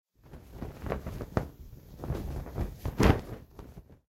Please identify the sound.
Fabric being shaken and rustled
fabric, rustle